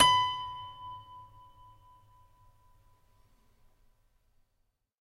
multisample pack of a collection piano toy from the 50's (MICHELSONNE)
toy, michelsonne, collection, piano